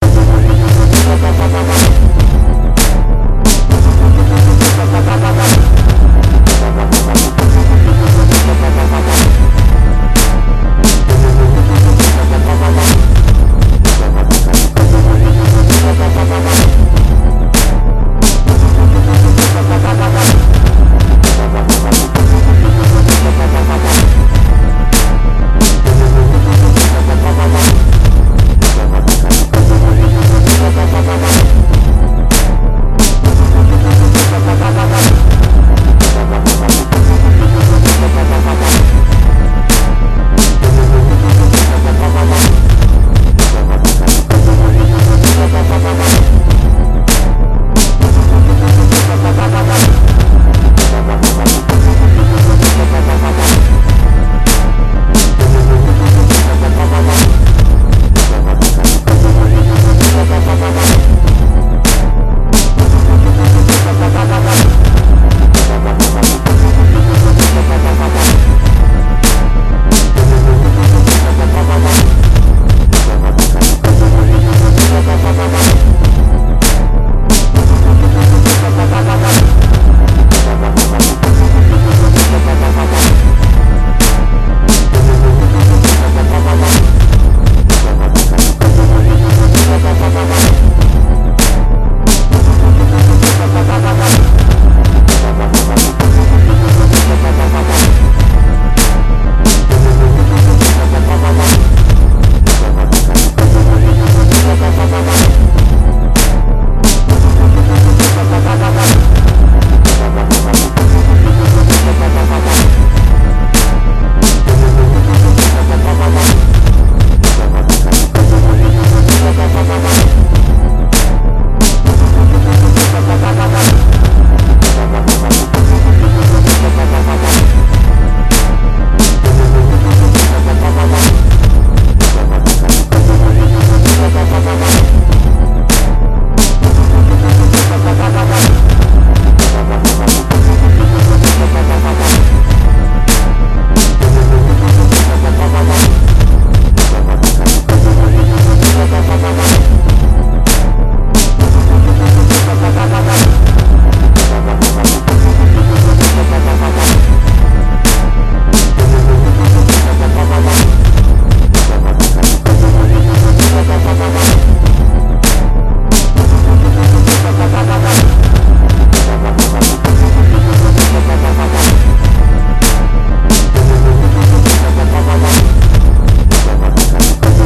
Dubstep beat1
I made a simple beat for a school project. You can use it if you need to.
beat, wub